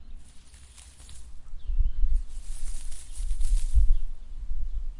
Touching leafs. Good for foley or sound design. Sorry for the lack of Hipass. Recorded with Zoom H4n